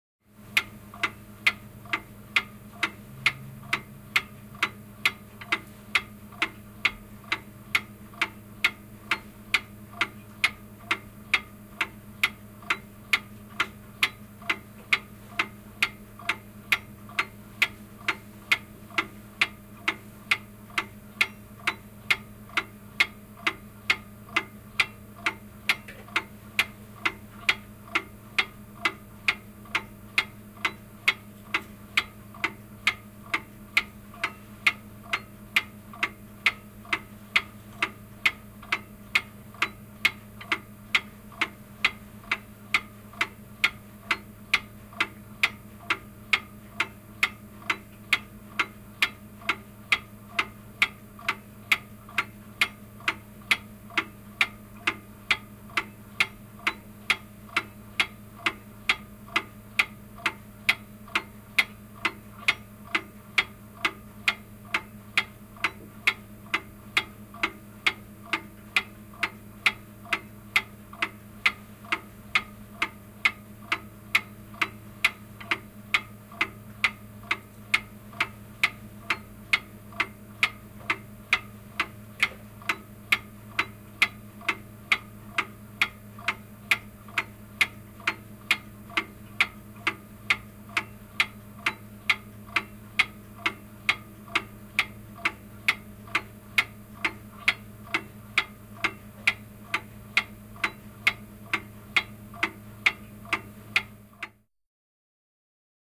Kello käy melko nopeasti. (Gustav Becker)
Paikka/Place: Suomi / Finland / Nummela
Aika/Date: 23.05.1992